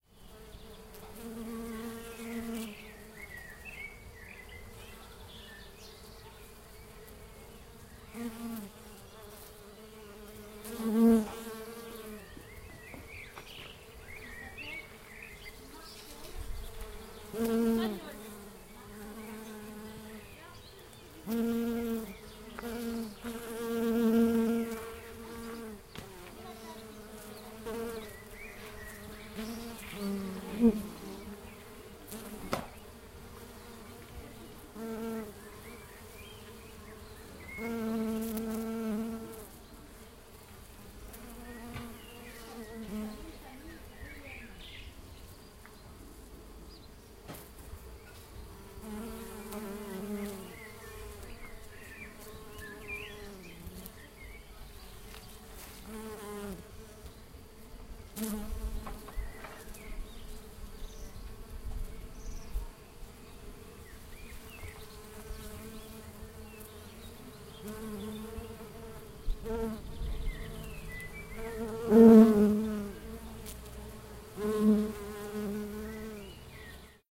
Lot's a bees in a bush
Enregistrement de nombreuses abeilles entrain de butiner un buisson
Record with a H2n